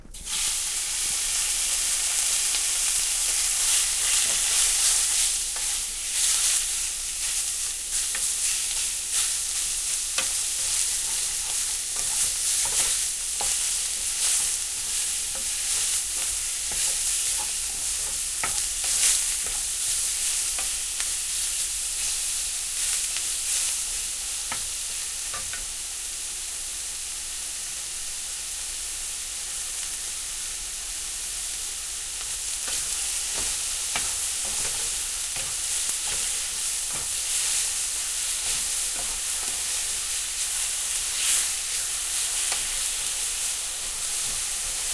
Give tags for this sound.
cook; cooking; food; fry; frying; kitchen; meat; oil; pan; roast; vegetables

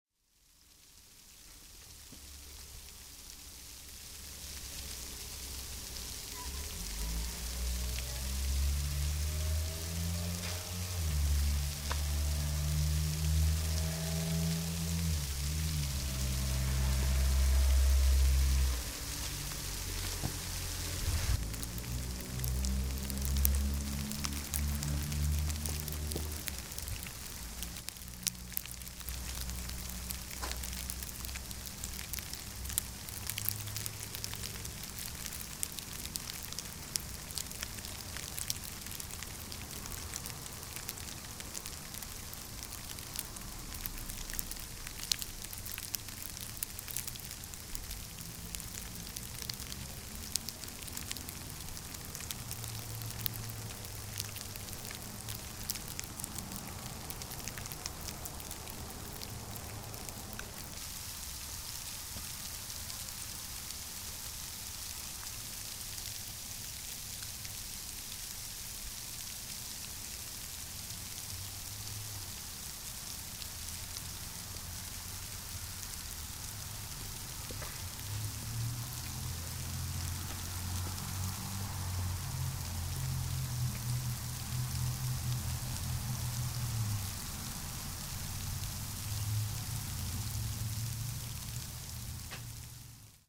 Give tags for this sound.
bleak; cold; sleet; winter; weather; field-recording

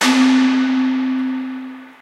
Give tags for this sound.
edited; c4; pitched-percussion; natural-ambiance

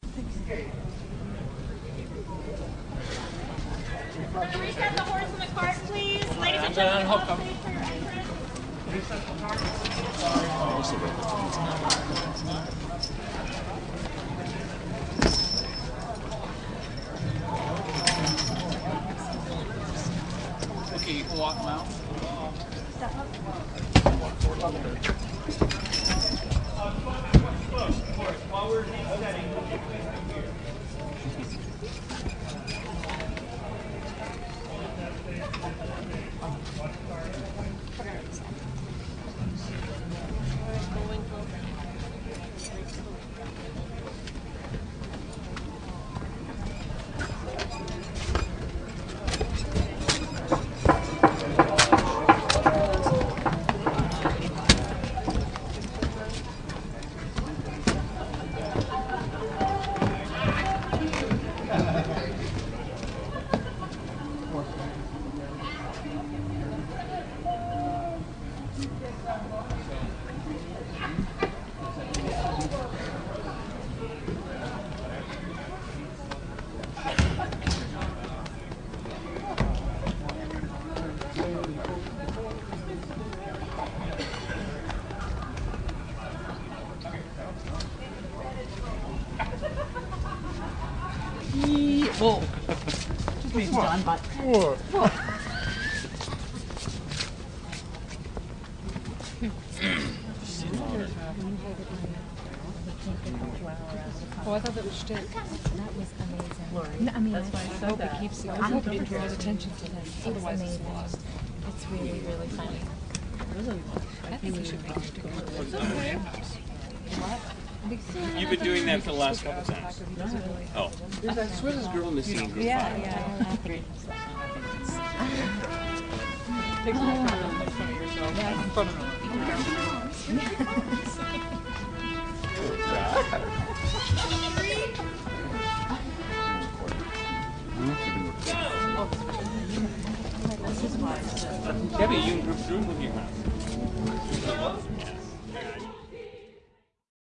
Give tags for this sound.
rehearsal; field-recording; backstage; mozart; horse; opera; chicago

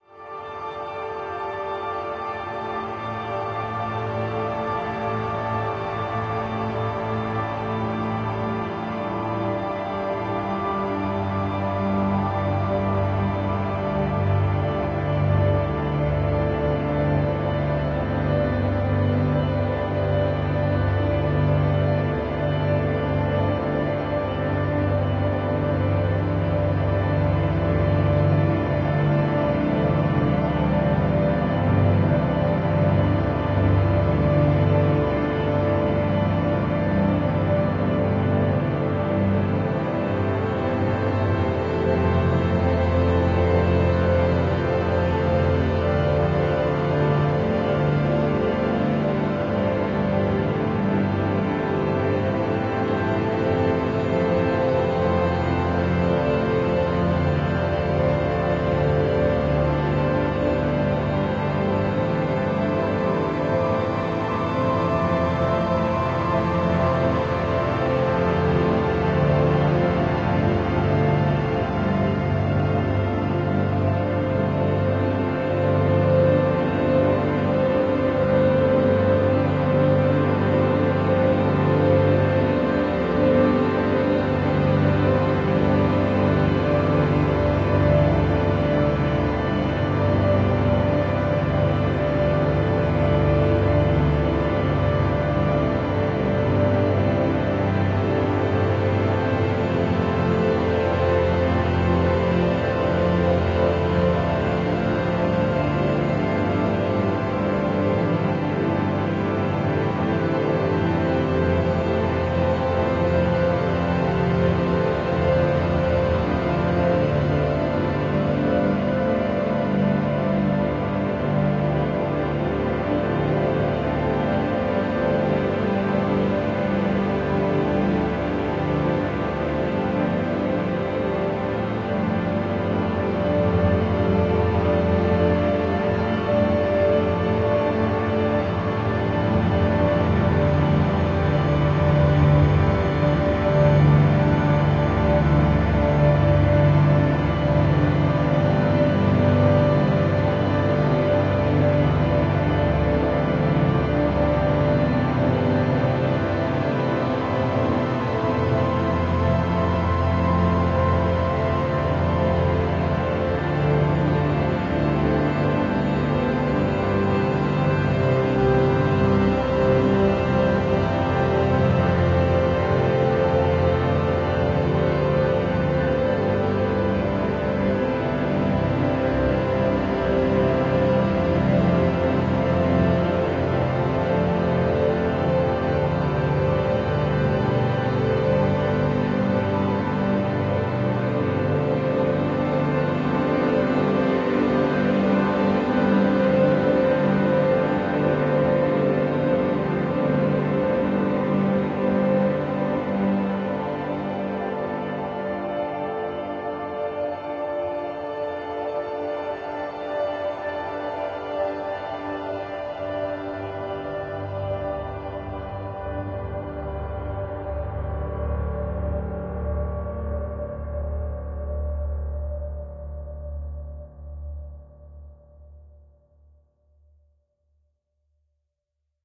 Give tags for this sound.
ethereal; floating; synthetic-atmospheres; atmospheric; emotion; experimental; blurred

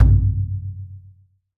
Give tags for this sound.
simple; recording; deep; hit; sample; drum; percussion

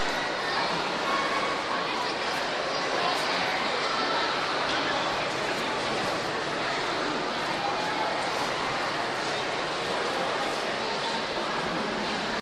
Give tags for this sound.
field-recording; monophonic; road-trip; summer; travel; vacation; washington-dc

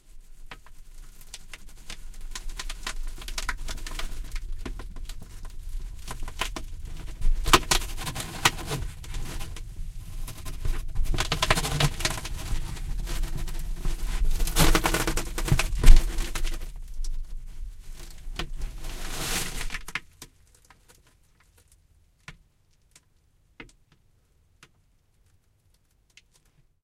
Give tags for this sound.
box; break; cardboard; crash; tension

